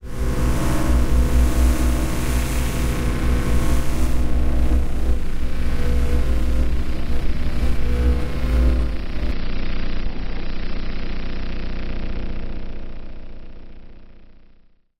Sci-Fi - Effect - Hum 16
engine, interface, noise, spaceship, ui, circuits, power, fuse, whoosh, Sound-Effect, Sound-design, space, sfx, neon, drone, ambience, buzzing, hum, scifi, sci-fi, charging, electricity, buzz, soundscape, futuristic, transition, field-recording, swoosh, interference